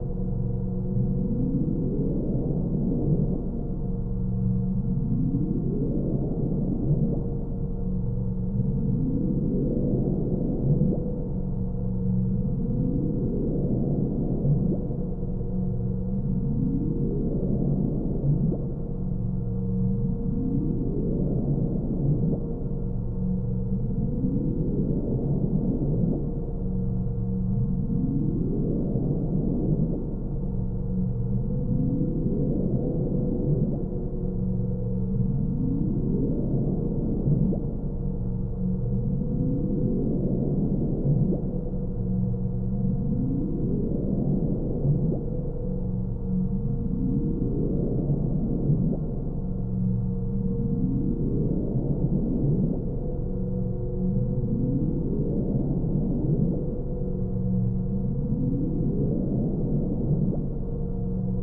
Kaiju Lab Interior Ambience #1
Laboratory/Control Room ambiance reminiscent of 1960s science fiction films. Created with Reaktor 5.8
Laboratory, Ambient, Kaiju, Sci-Fi